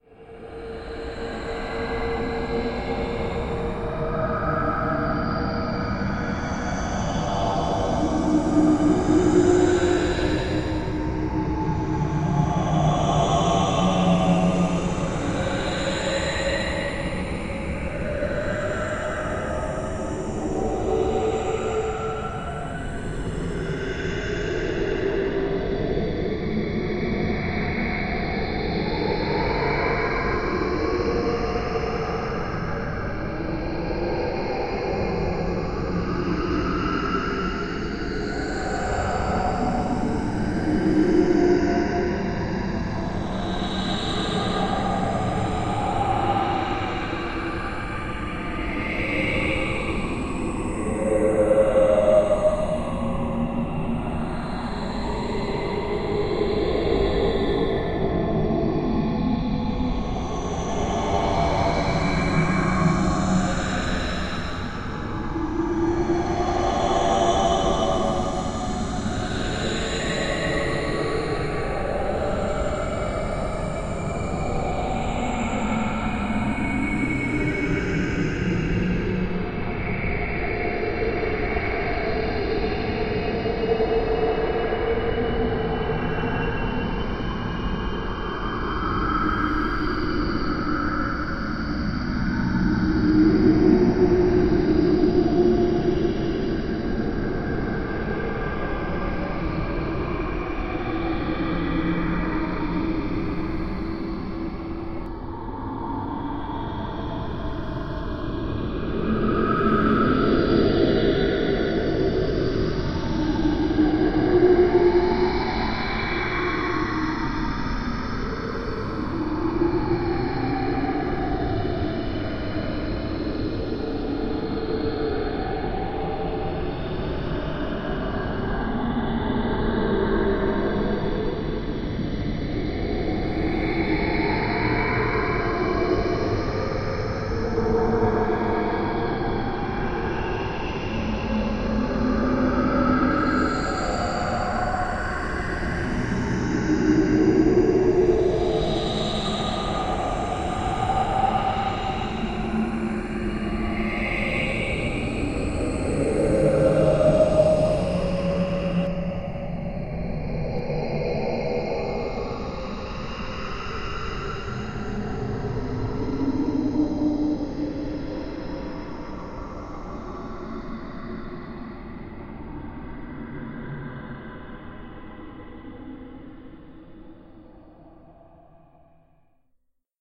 Wicked Stereo Stretch
Alien, Ambient, Audio, Background, cinematic, click, Dub, Dubstep, Effect, Electronic, Funny, game, Machine, movie, Noise, pop, project, Sci-Fi, Sound, Spooky, Strange, stretch, Synth, track, video, Weird, Whirl, whoosh